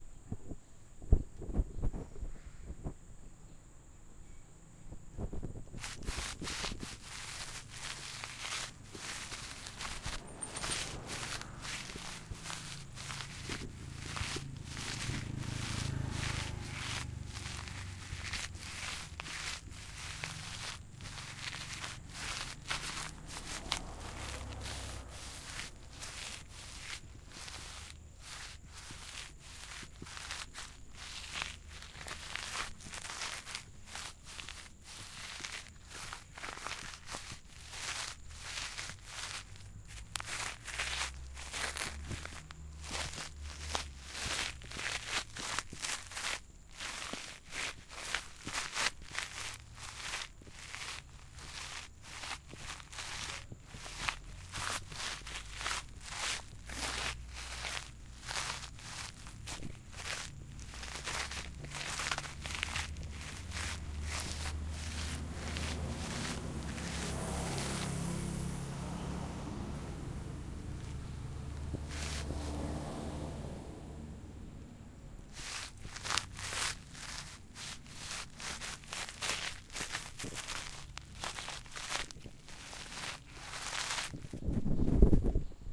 Content warning
Grass, Scuff